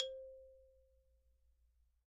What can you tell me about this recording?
Kelon Xylophone Recorded with single Neumann U-87. Very bright with sharp attack (as Kelon tends to be). Cuts through a track like a hot knife through chocolate.
kelon, mallets, xylophone, samples